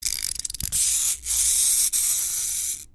perc-monkey-guiro
Toy monkey sounds like a guiro. Recorded at audio technica 2035. The sound was little bit postprocessed.
toy, guiro, perc, percs